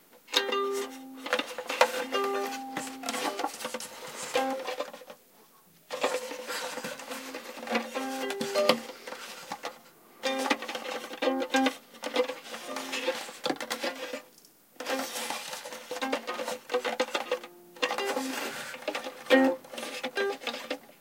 random noises made with a violin, Sennheiser MKH60 + MKH30, Shure FP24 preamp, Sony M-10 recorder. Decoded to mid-side stereo with free Voxengo VST plugin.